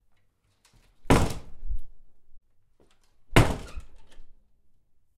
Door slam
Slamming a door twice.
bang, close, closing, door, hit, shut, slam, slamming, swing